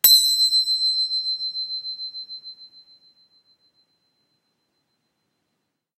indian bell chime
one single ring of a set of indian finger cymbals. good for when angels get their wings.